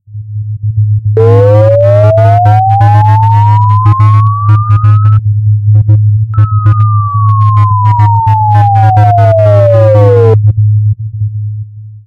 This sound could have been sampled from an old future movie !
Generate a synthetic sound
> Paulstretch
> amplification max
> saturation
> Reverse